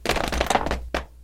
small crack shatter sound zzz000111 (2)
made by small things when you find your room